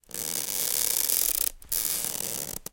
Wicker Basket Crush 3
The sound of dry cane creaking against its own weave. Could be used to simulate ships rigging (slowed down).
cracking, crackling, creaking, crush, sewing-basket